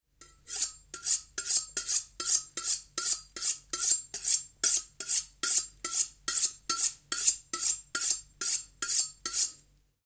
Knife Sharpening
Primo, chef, vegetables, LM49990, EM172